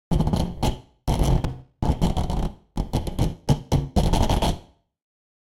harsh clicks
I dragged a screwdriver across the tension springs on my microphone boom, then pitched it slightly down. I was hoping it would sound like shorting electricity, but it sounded more like a pitched down screwdriver being dragged across a tension spring. SM58 to Mackie to Extigy
creek, spring